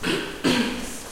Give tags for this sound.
field-recording
throat

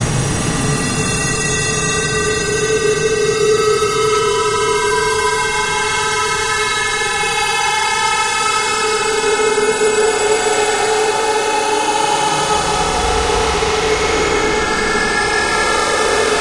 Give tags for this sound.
audio-triggered-synth
raw-material
SlickSlack
feedback-loop
RunBeerRun
paulstretch
Ableton-Live
paul-stretch
special-effects
FX

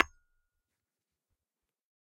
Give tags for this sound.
bright
glass
hammer
hit
ornament
short
tap

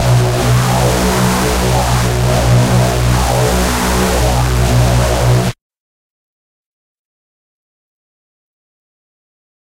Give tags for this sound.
processed
reese
hard
distorted

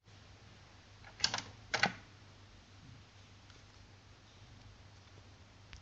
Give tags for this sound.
button
turn-on

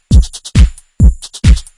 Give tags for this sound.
electronica
kick
drum
trance

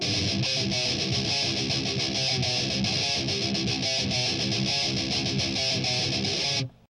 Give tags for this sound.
heavy
metal
rock
thrash

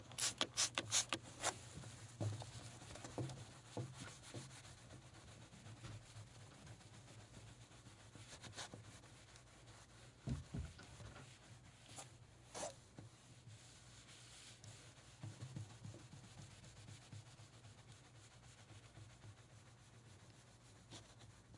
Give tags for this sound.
glass
washing
washing-glass

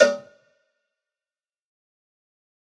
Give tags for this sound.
cowbell
dirty
drum
drumkit
pack
realistic
tonys

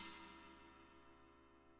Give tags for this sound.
china-cymbal
sample
scrape
scraped

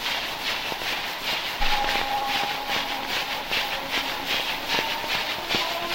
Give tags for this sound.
clicks
sample
lowfi
record
keyboard
machine
static
mix
computer
processed
acoustic

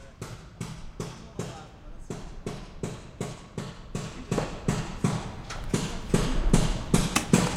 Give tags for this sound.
building
construction
environmental-sounds-research
field-recording
hammer

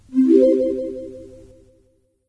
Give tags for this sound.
abstract
game
generic
success

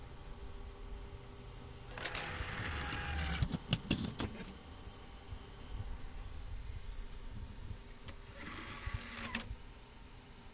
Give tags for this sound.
cd-tray
close
mechanical
open
recording

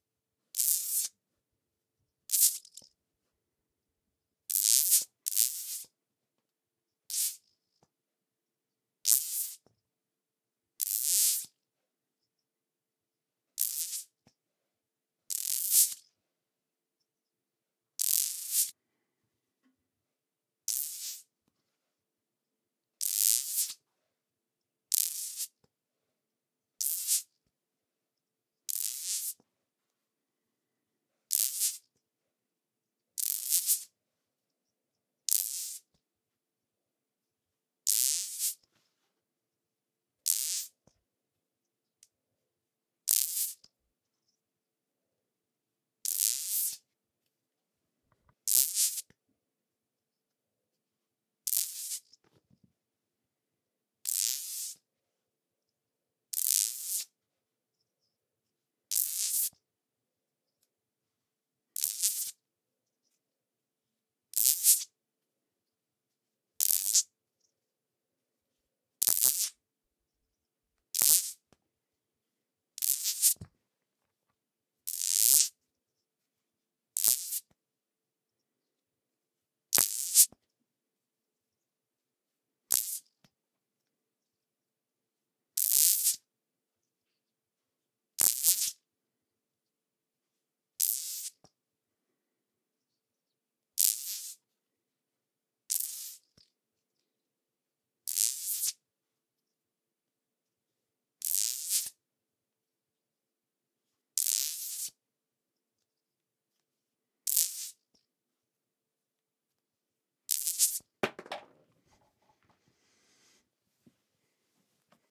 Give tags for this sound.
burst
close-up
magnet
noise
short
weird

